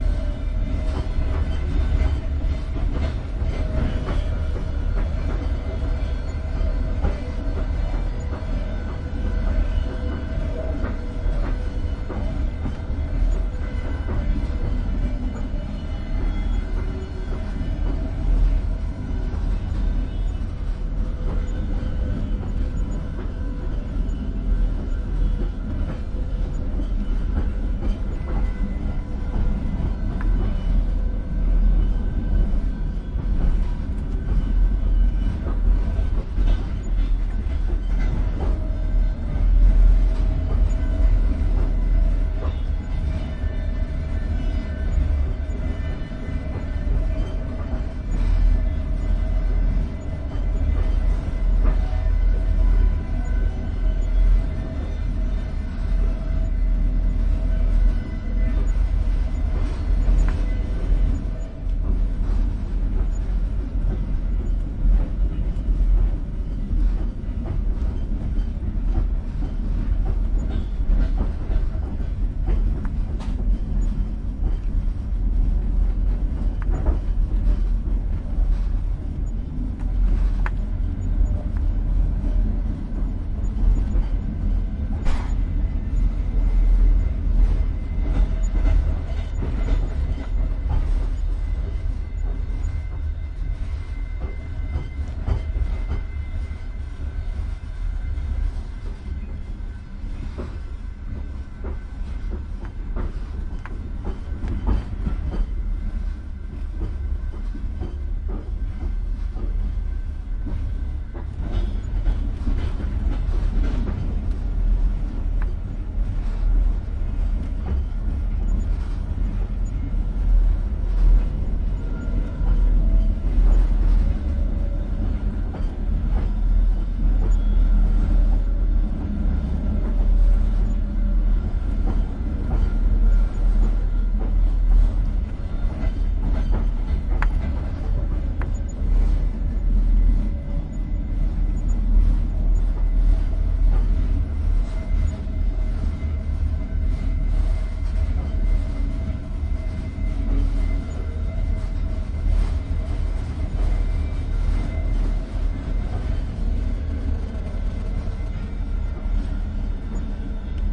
Train cabin by night, wheels grind. Kazan - Yekaterinburg
In cabin. Train wheels grinding the rails, odd wind howling makes a melody. Water bottles stored under the cabin seats make soft sounds. Recorded with Tascam DR-40.
I've only used the internet to facilitate human contacts. Being with actual people in actual places has always been the goal. I like it when, in the presence of real people, we don't speak for a while. We just do something that we've agreed to do together in silence. There is an agreement and we don't have to think about who we are, how we can be who we are or what it all means. Doing becomes meaning.
cabin, field-recording, howling, night, train, trans-siberian, water-bottle, wind